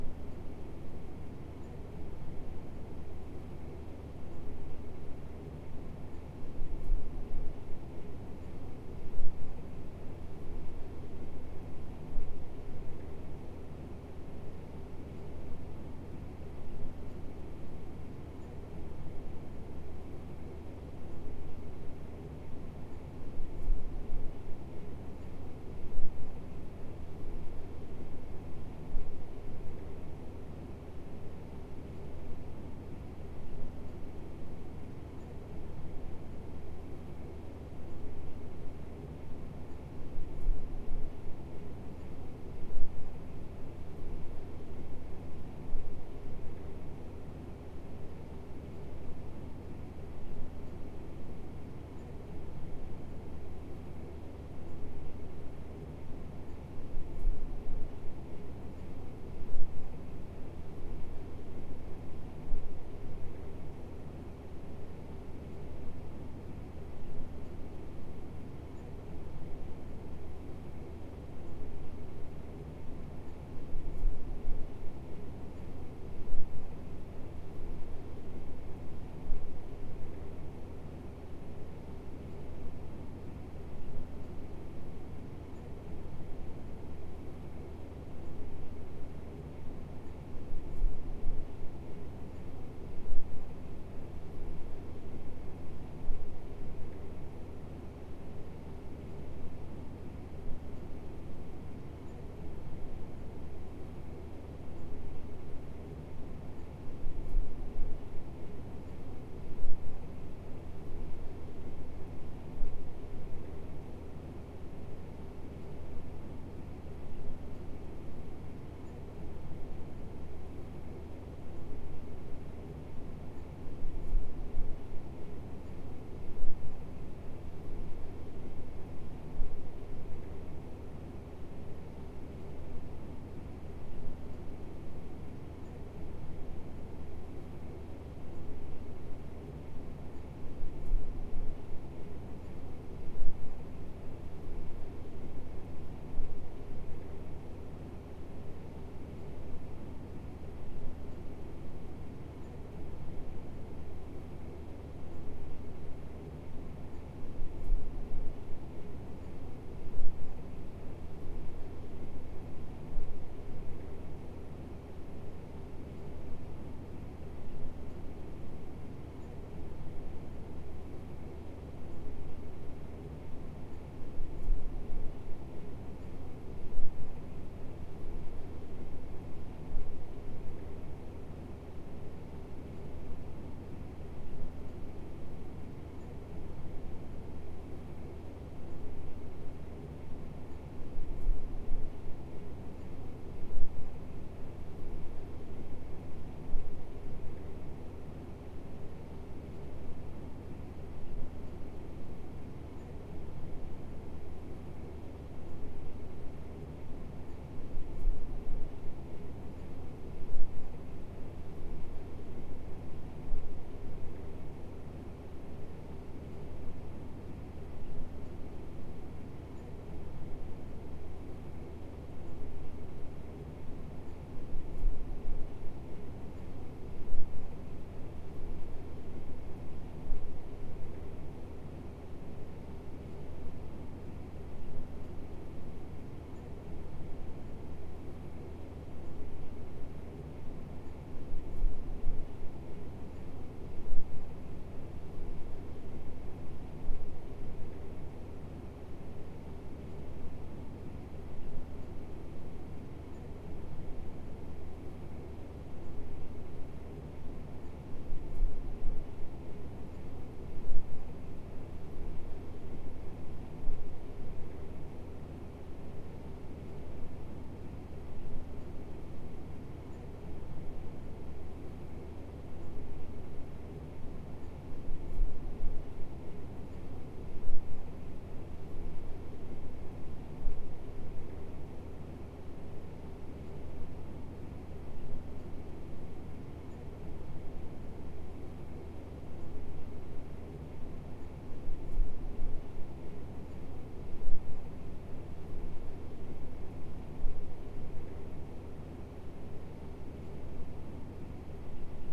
RomTone3 Aircon
Large ceiling mount office air conditioner hum
Recorded from 1 metre away with Zoom. Clean up in RX7
air-con, ambient